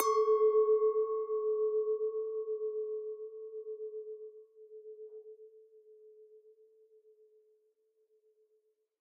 Just listen to the beautiful pure sounds of those glasses :3
glas, soft, glass, clink, crystal, edel, wein, glassy, weinglas, pure